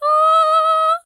Bad Singer (female) - Mal cantante (femenina)
I recorded some funny voices from friends for a job.
Grabé algunas voces graciosas con unos amigos para un trabajo.
GEAR: Cheap condenser mic/presonus tube.
EQUIPO: Micro de condensador barato/presonus tube.
cantando, dumb, female, femenina, singing, voice, voz